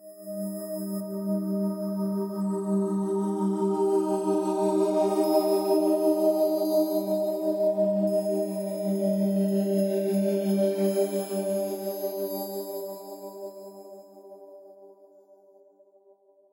Vital Abbysun Gmin 1
Atmospheric pad in G minor 85/170 bpm recorded using Vital VST instrument on Mixcraft DAW, edited with Audacity
ambience, ambient, atmosphere, atmospheric, drum-and-bass, soundscape